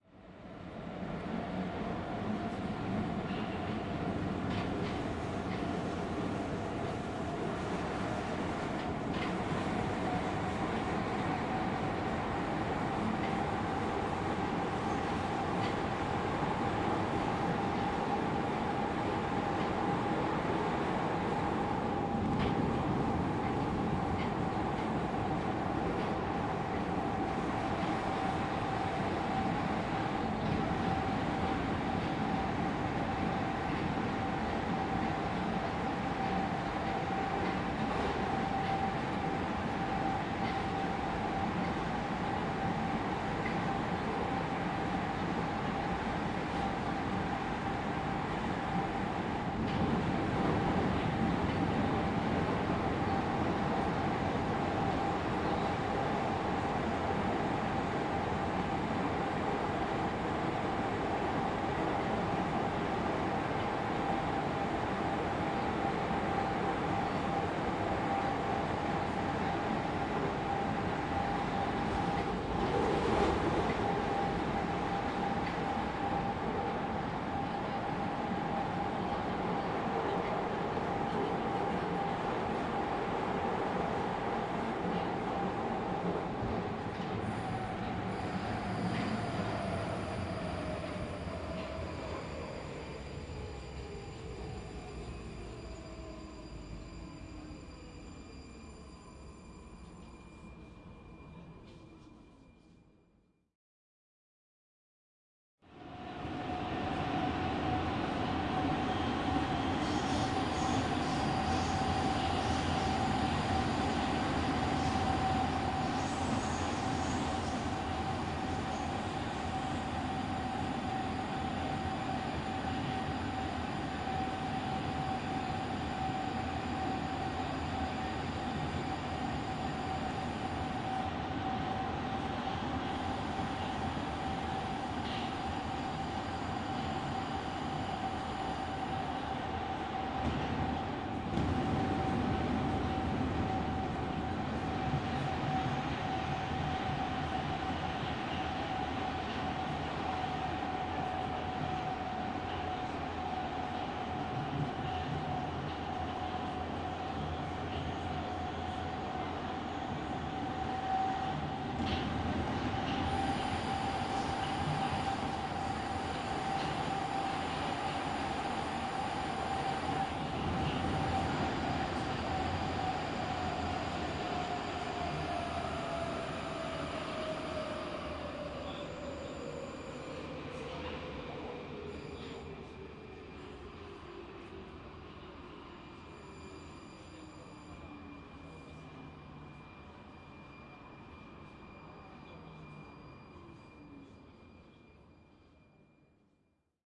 10 Atmosphere inside the train
Two recordings of an ambient inside of a underground train. Recorded while riding the train from one station to another.
ambient, CZ, Czech, metro, Pansk, Panska, subway, train, underground